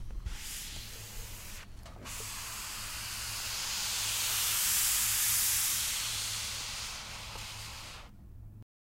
An eraser sliding across the chalk tray.